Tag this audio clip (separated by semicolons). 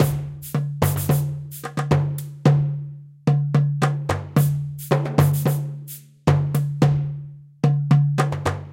percussion; orchestral; drum-loop; movie; drums; soundtracks; film; perc; trailer; cinematic; epic; filmscore